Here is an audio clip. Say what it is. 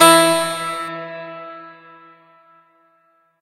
I started with a beep/sine then severely modified it to get it to a decent sounding harpsichord-like sound, or something similar.
Note: not a sample, was completely synthesised.